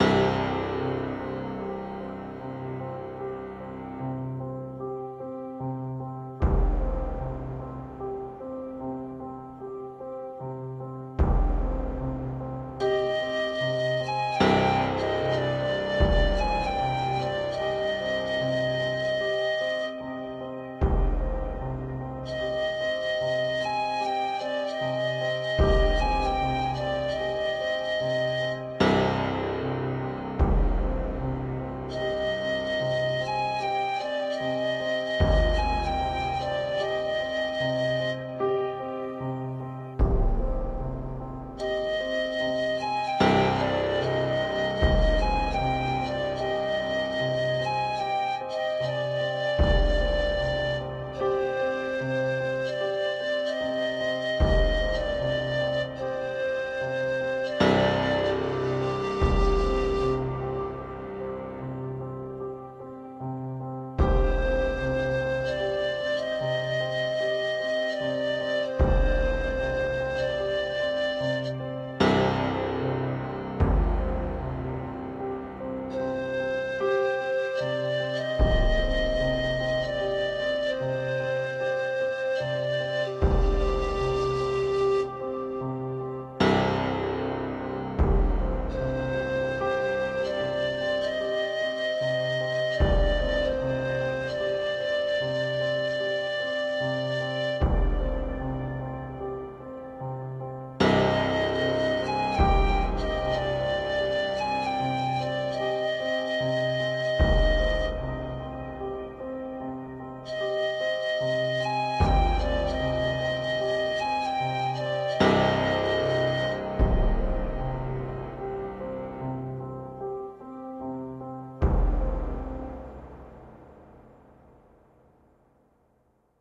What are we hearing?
Dulcet flute - Music track
Dulcet flute.
Synths:Ableton live,Kontakt.